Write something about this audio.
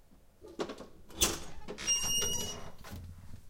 door wood open across hall, with a little echo